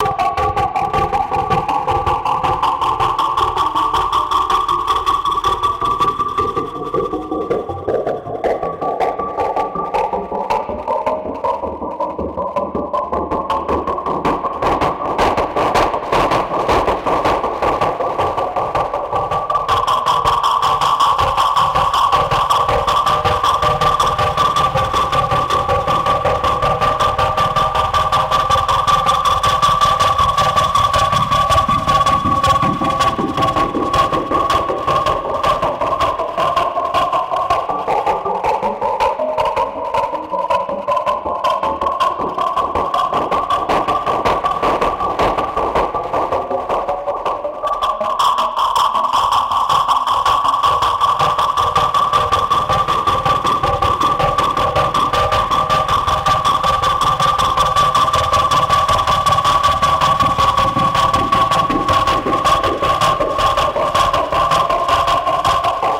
Terror 01 sad int loop
A minimalistic evil dark hammering sound best described as a crossover between a pneumatic drill and a cybernetic engenered hammer.